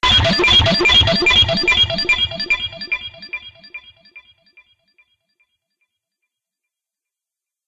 Short looped sequence processed with variable BPF, feedback delay line and reverb effects.
reverb, softsynth, delay, synthesis